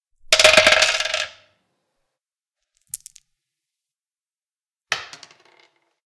Various sounds of screws.
Recorded with Oktava-102 microphone and Behringer UB1202 mixer.
screw, metal